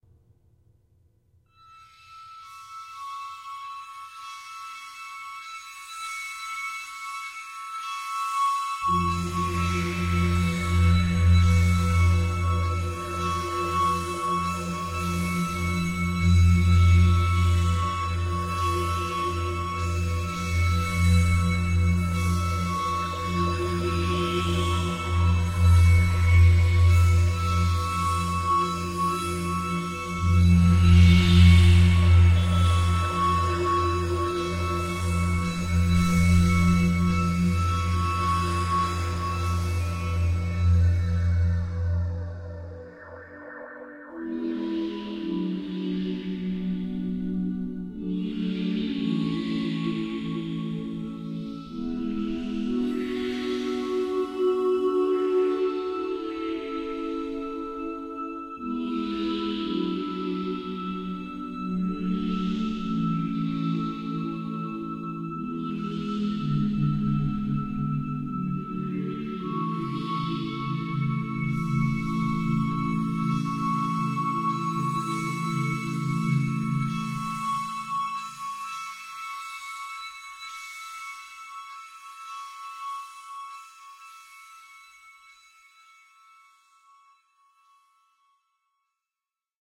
F Lydian drone segue into sea-chantey-ish melody.
ambient, film-score, maritime